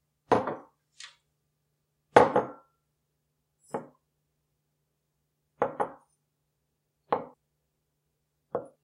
Glass Clink
Setting down a glass cup on a hard surface.